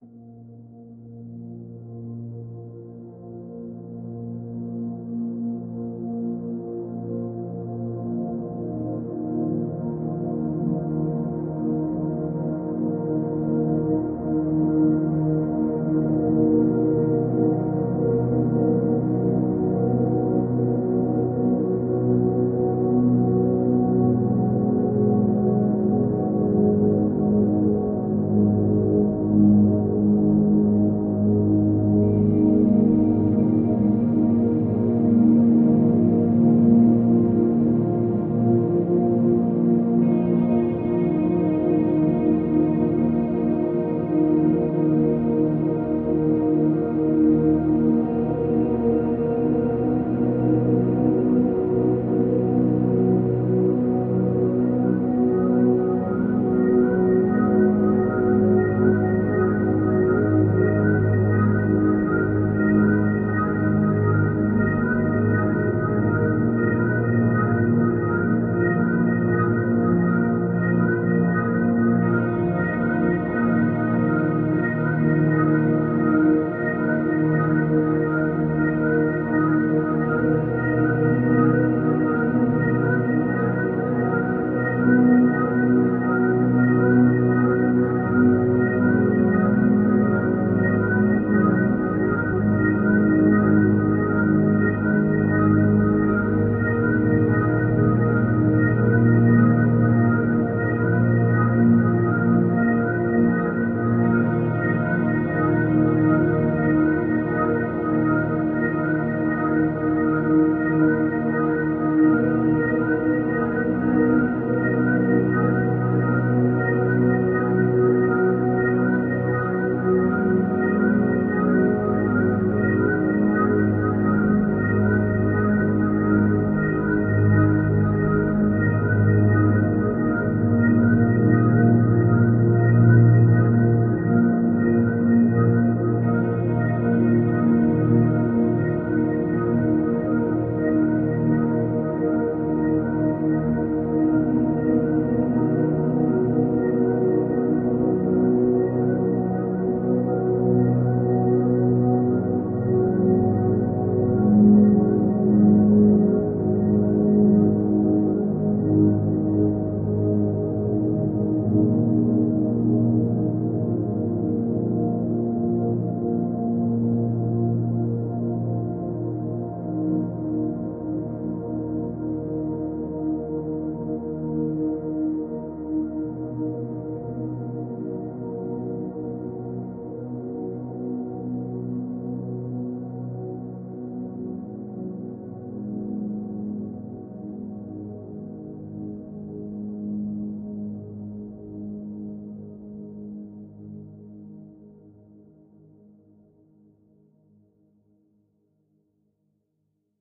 In Silence
atmosphere, soundscape, atmospheric, calm, meditation